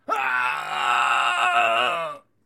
Man Scream2
Grunt, man, Scream, voice